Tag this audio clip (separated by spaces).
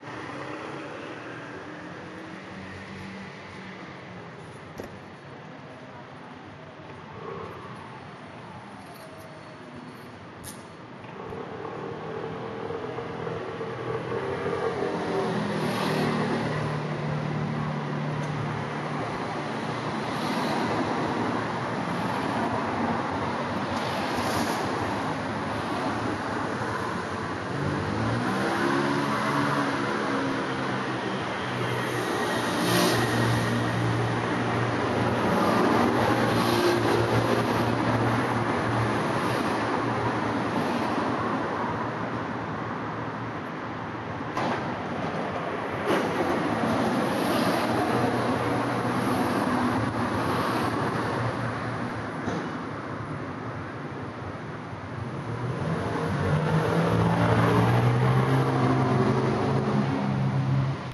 ambient ambient-noise background background-noise car city firenze florence noise